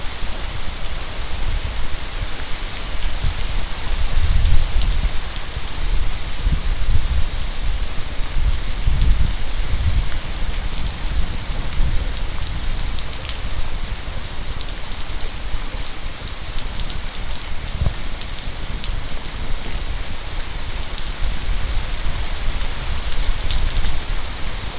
Recorded Rain
Moderate rain recorded with a stereo microphone through a second-story window
downpour, nature, rainfall, rain, weather